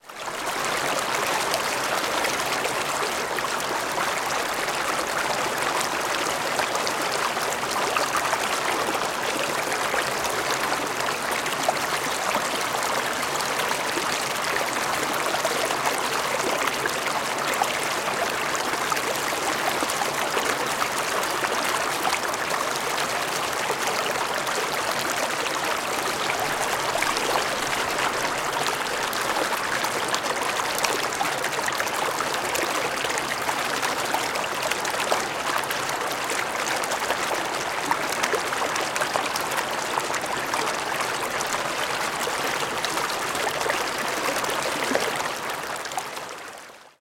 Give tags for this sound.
water; flow; wet; creek; brook; nature; gurgle; flowing; liquid; field-recording; babbling; stream; outdoors

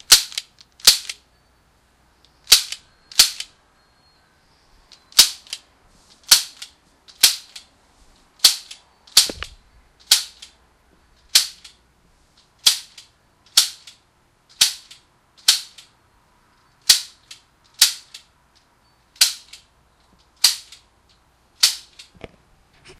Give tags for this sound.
air; bb; gun; pistol; plinking

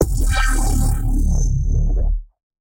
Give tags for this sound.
Bass; Dubstep; Electric-Dance-Music; Sample

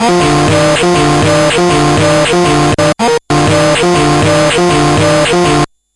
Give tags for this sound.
phone; bend

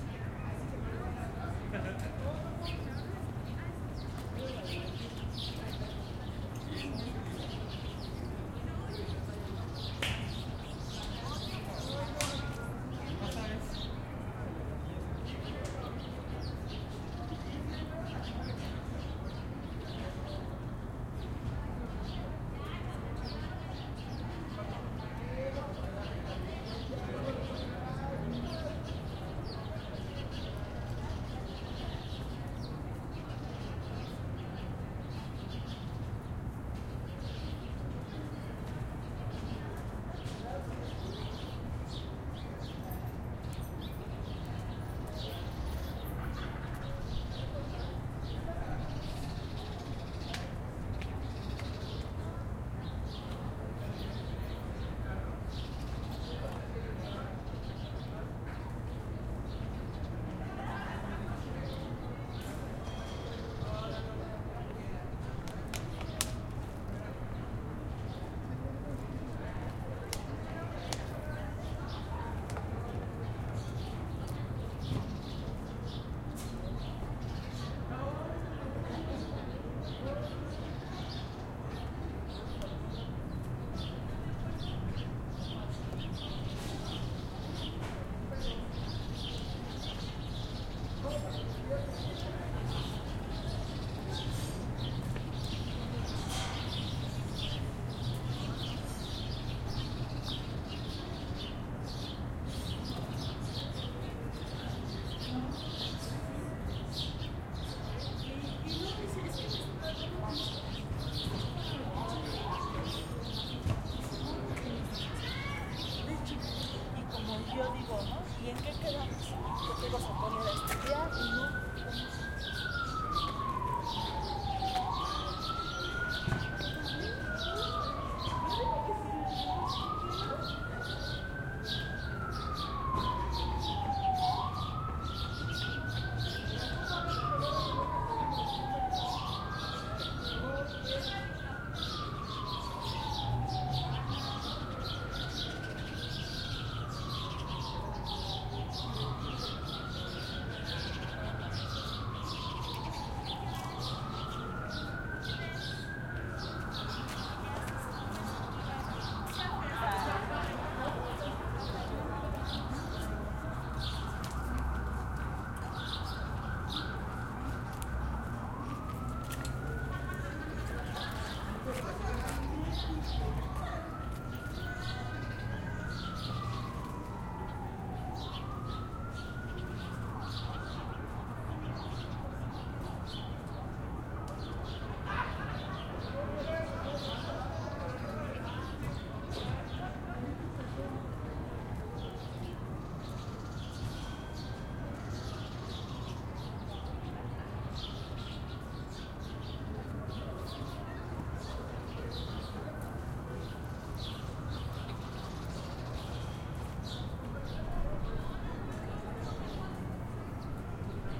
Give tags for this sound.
Birds; Schoolyard

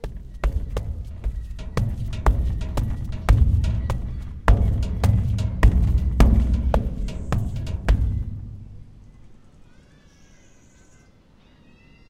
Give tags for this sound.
hit; hollow; impact; metal; metallic; OWI; rumble; rumbling; step; stepping; thud; thudding

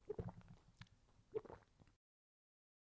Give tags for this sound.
Game; Potion

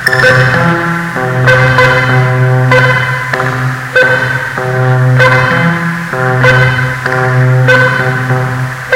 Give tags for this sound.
ambient; circuit; faith; synth; soundscape; bent; hellish; idm; noise; glitch

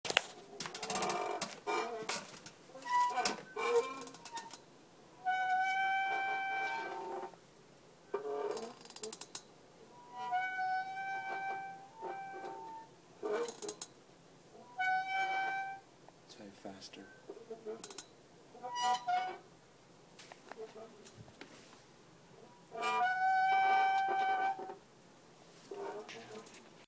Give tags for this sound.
brakes; chair; hinge; machine; mechanical; metal; screech; squeak; whine

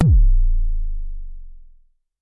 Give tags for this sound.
kick; bassdrum; jomox; bd; analog

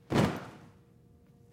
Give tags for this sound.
linen; crash; soundeffect

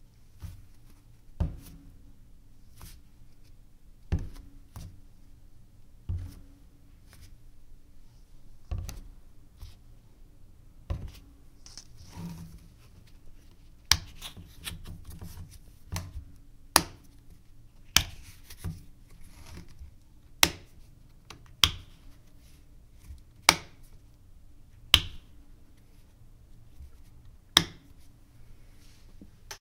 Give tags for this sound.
bottle; cap; close; closing; counter; kitchen; open; opening; picking-up; plastic; plastic-bottle; setting-down; soap; top

